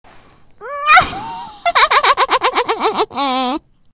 Witch laugh
Witch's laugh recorded on IPhone on January 2020. Just my voice being witchy.
creepy, evil, laugh, Witch